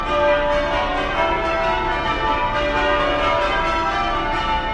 Westminster 03 bells
Church bells recorded near Westminster Abbey.
bells, churchbells, field-recording